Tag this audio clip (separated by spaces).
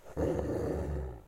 animal dog growl guttural play